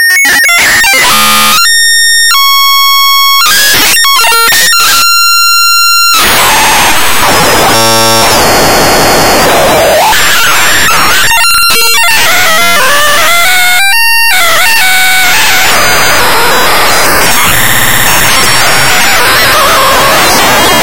glitchy modem-type noises #8, changing periodically a bit like sample and hold, random walk through a parameter space, quite noisy. (similar to #10 except more frenetic). these sounds were the results of an experimental program i wrote to see what could be (really) efficiently synthesized using only a few instructions on an 8 bit device. the parameters were randomly modulated. i later used them for a piece called "no noise is good noise". the source code was posted to the music-dsp mailing list but i can't find it right now.
synthesized, glitch, noisy